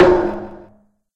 Deep Clang
A low, ringing "donk" sound.
Recorded with a medium-quality radioshack headset.
clang, metal, metallic